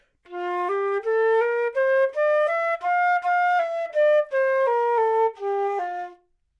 Part of the Good-sounds dataset of monophonic instrumental sounds.
instrument::flute
note::F
good-sounds-id::7253
mode::major
Intentionally played as an example of bad-articulation-staccato